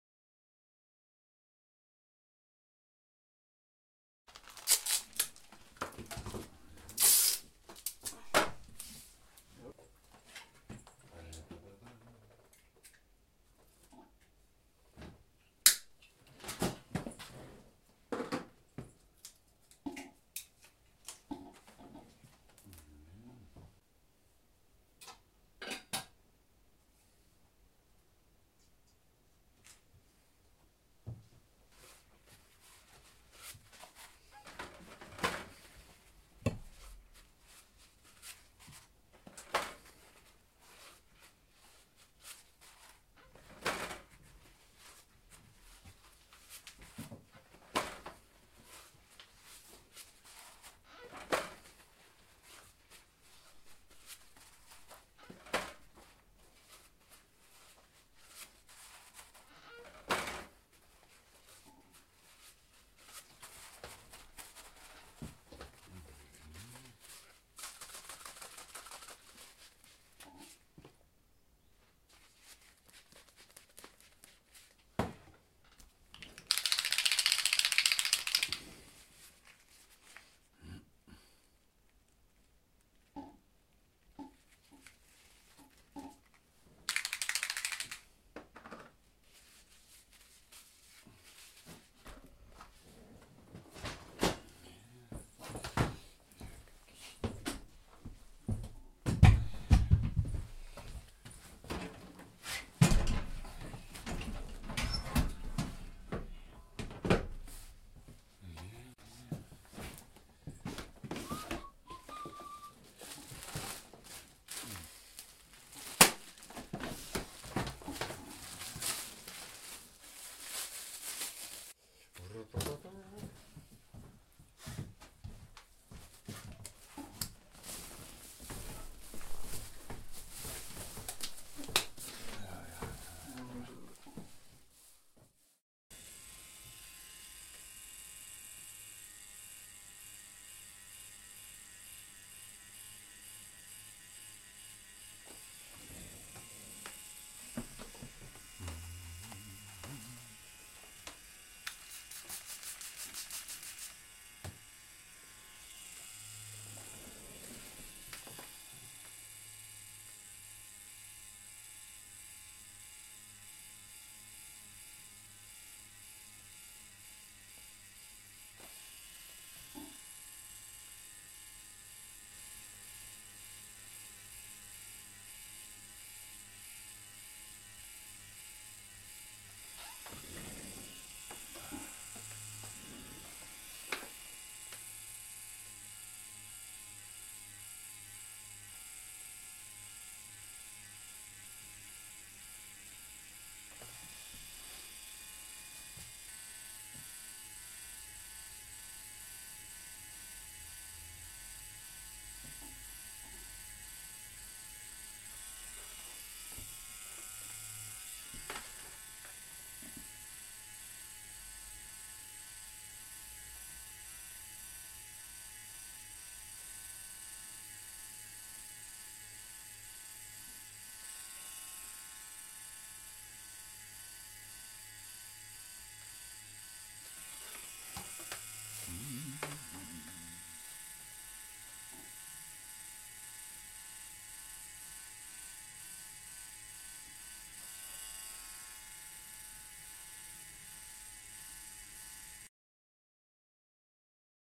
Getting a tattoo
Recording of some sounds you can hear in a tattoo-studio.
Getting the ink ready, shaking the bottle, putting ink in the little plastic pots, getting the chair ready with plastic, putting on gloves, tattooing dotwork, some humming of the tattoo-artist.
Recorded with a Tascam DR-40.
tattoo, tattoo-artist, tattoo-studio, getting, tattoos, ink, shop, tattoo-shop, a